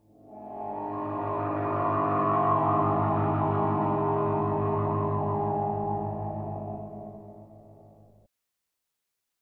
Deep Pass By
Made this with my accordion, use any way you want.
space-probe-pass-by, deep-understanding, just-found-out